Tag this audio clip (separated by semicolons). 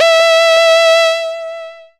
multisample; saw; basic-waveform; reaktor